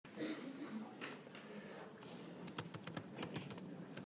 Keyboard Library
Someone typing in a computer, atmosphere: library.
Recorded with a H4N.
Edited with Adobe Audition CS6 (2009) : I cleaned the noise and deleted some unnecessary parts.
Recorded in Madrid, Universidad Europea de Madrid Campus de Villaviciosa de Odon, 20/Octubre/2015 at 1:30 pm